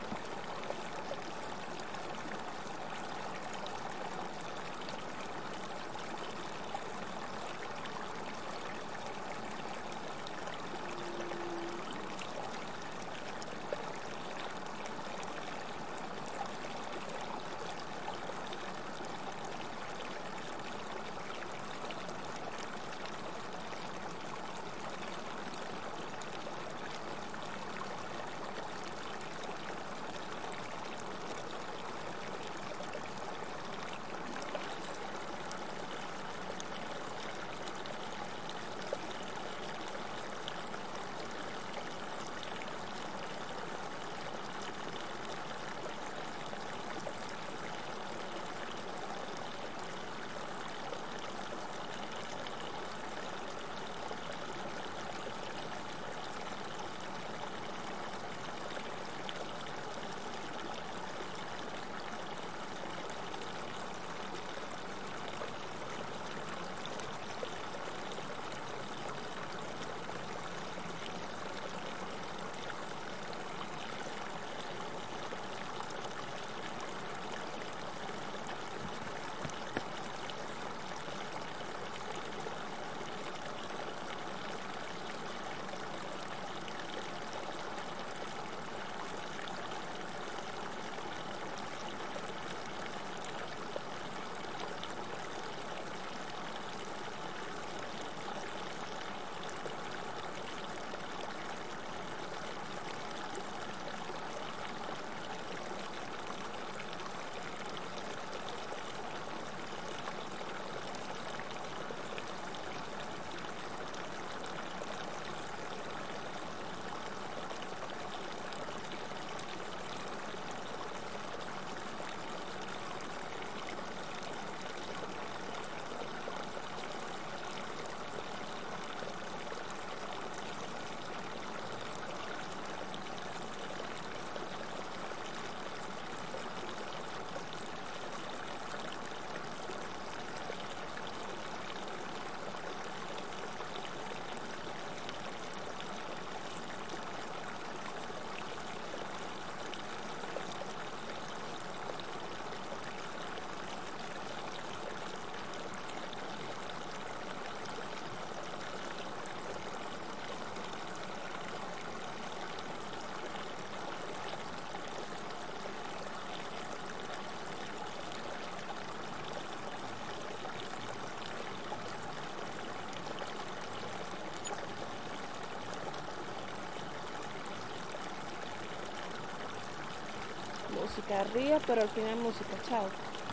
Near Small river, a peacefull sound, made with Zoomh4n. Merida Venezuela.
Part of my Sound Library that I made for my Thesis